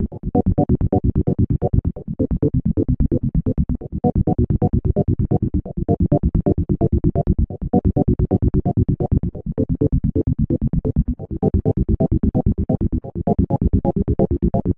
Lov37gate2
gated pad 2
gated, pad, texture